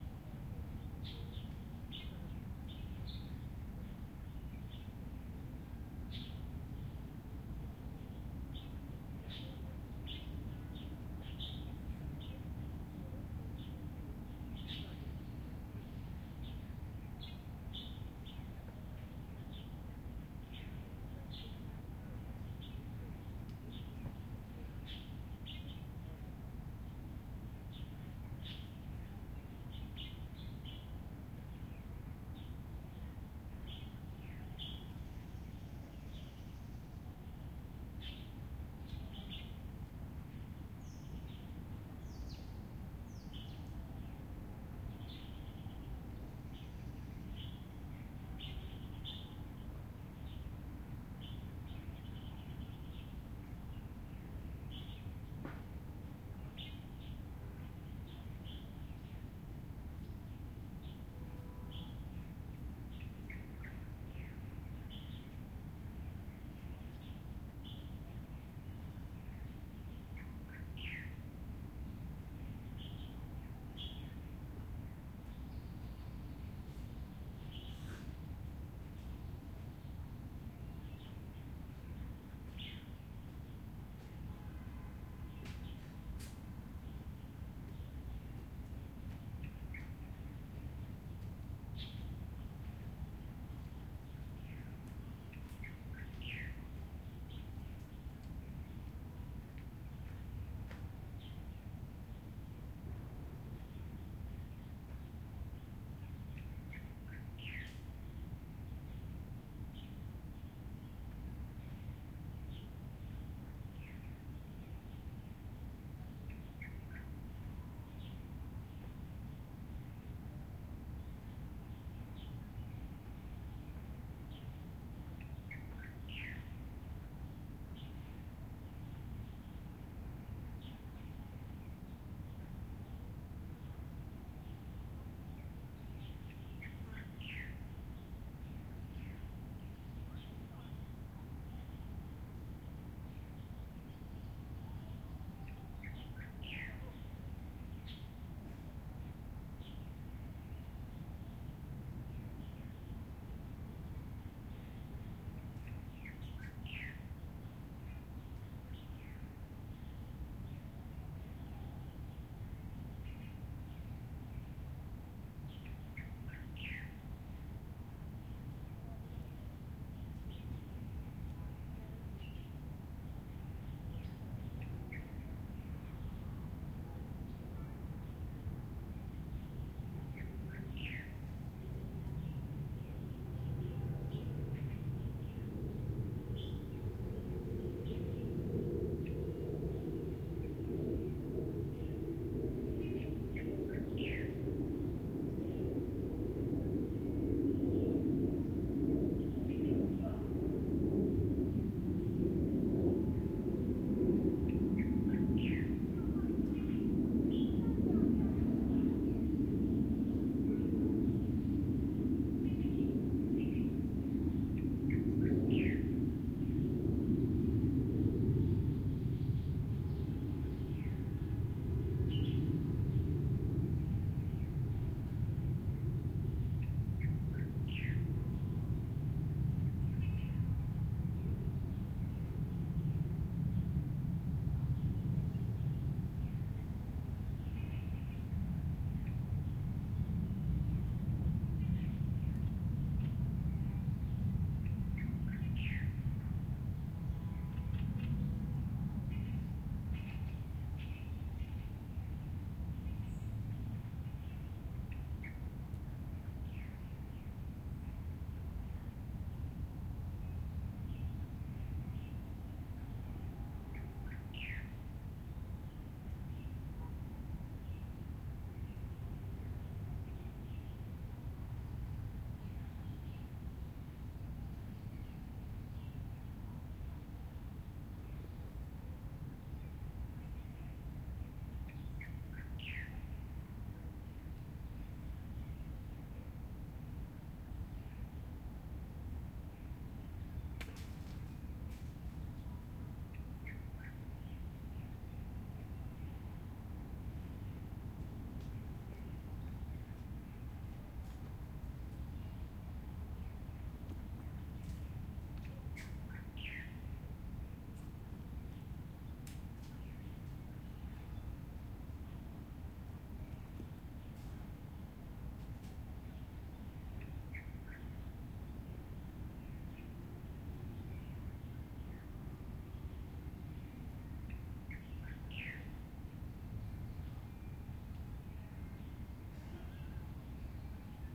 Park in a city
Apr 17, 2017
Recorded at Precious Stone Hill, Hangzhou (30°15'35.5"N 120°08'40.0"E), with my Samsung Galaxy S7
ambience, birds, field-recording, hangzhou, nature, plane, traffic, westlake